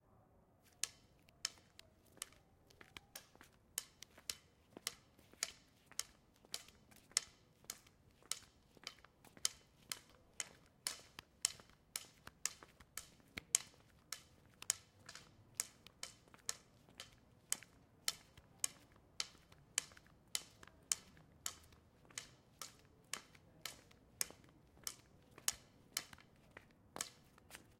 Blind person walking with White Cane
walking with white cane stick. In quiet street in the city. Recorder with Sound Device 702T (shotgun mic)